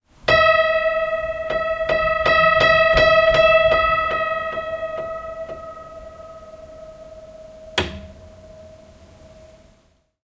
Detuned Piano Simple 4
series of broken piano recordings
made with zoom h4n
anxious, dark, destroyed, haunted, horror, macabre, untuned, upright-piano